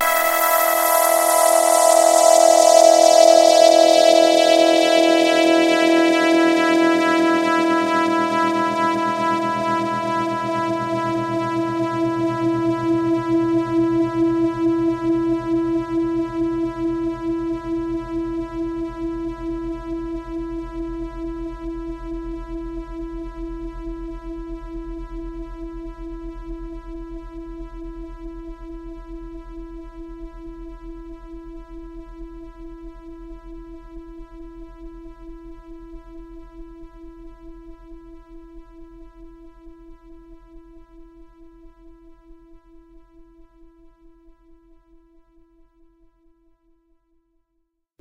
Q Saw filter sweep - E3
This is a saw wave sound from my Q Rack hardware synth with a long filter sweep imposed on it. The sound is on the key in the name of the file. It is part of the "Q multi 003: saw filter sweep" sample pack.
electronic, multi-sample, saw, sweep, synth, waldorf